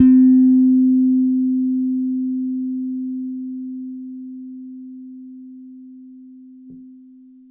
TUNE electric bass